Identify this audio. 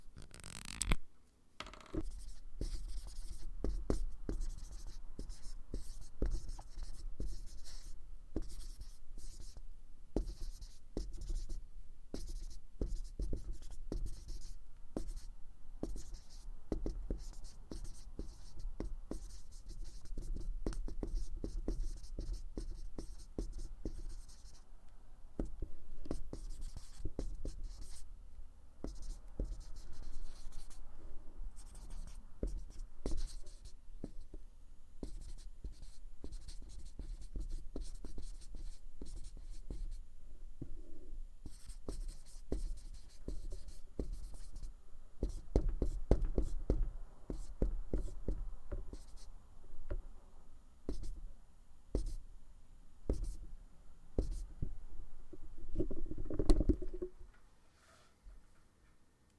marker on whiteboard
Someone writing on a whiteboard. The first part of the sound is taking the cap off the marker.